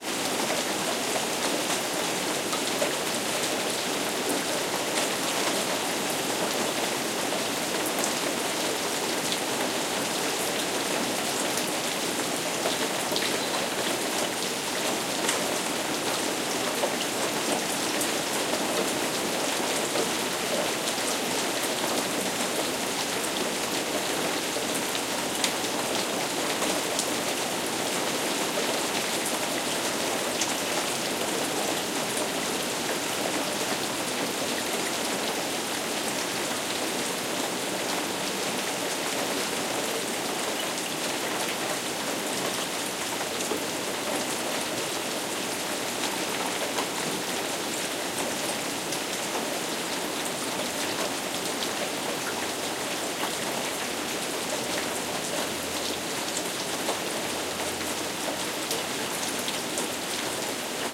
Spring Rain 1
Sample of a spring downpour. Recorded with a Marantz PMD 670, a Superlux S502 stereo mic and an Apogee Mini-ME.
Nature, Rain, Downpour, Field-recording, Drops, Peaceful, Water, Environment, Thunder